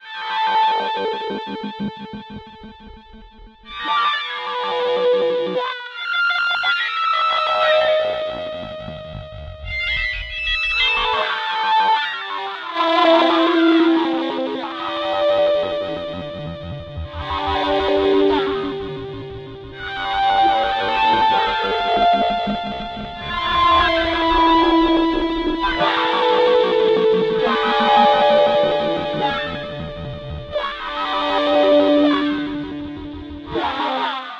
MOV. solo cuc
Wah-Wah guitar solo edited by Logic 6 with phasers, delays, distortion, flangers, noise filters and some chorus.
noise, electronic